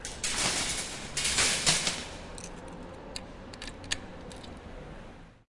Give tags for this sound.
mall; park

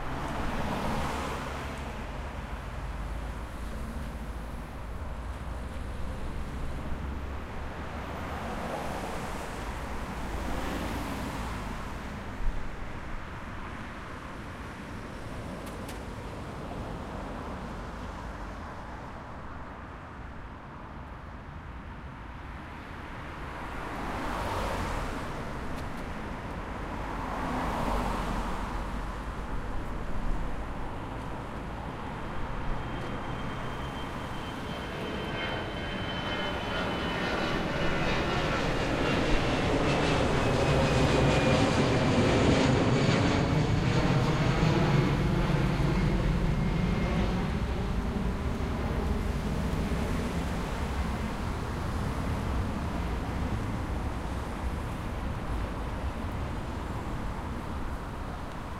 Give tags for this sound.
Airplane; Heavy; Jet; Jet-Airliner; Motorway; Traffic

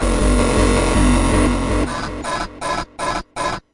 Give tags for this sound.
toy; glitch; circuit; bend; bent

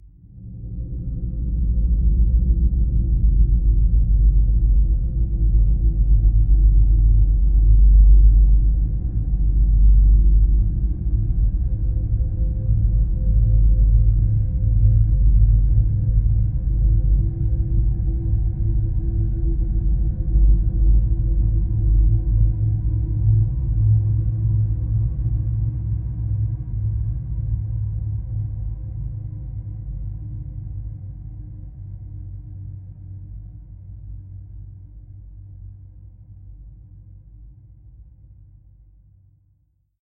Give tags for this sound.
cinimatic; soundscape; space

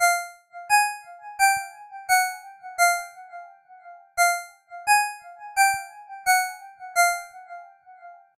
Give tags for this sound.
alarm; alert; alerts; cell; cell-phone; cellphone; mills; mojo; mojomills; phone; ring; ring-tone; ringtone

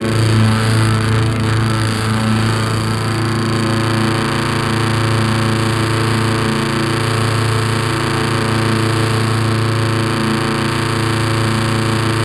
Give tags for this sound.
Multisample; Texture

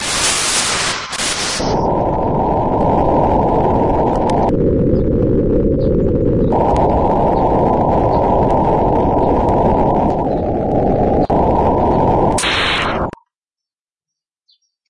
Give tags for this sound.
bad; natural; weather

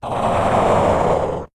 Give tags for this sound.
role-playing-game; negative; adventure; rpg; power-down; game-design; sci-fi; fantasy; action; feedback; dark; decrease; video-game; level-down; game-sound

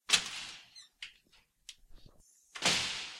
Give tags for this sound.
fly-screen-door; door-screen-door; foley; house

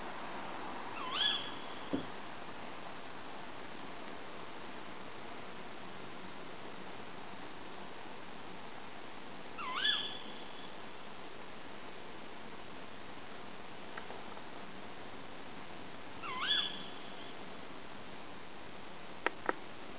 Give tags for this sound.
field-recording; tawny-owl; tawny; owl; bird-call; bird-song; bird

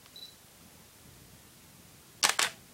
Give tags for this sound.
photo; camera; photography; shutter; 60d; canon; EOS; click; DSLR; SLR; beep

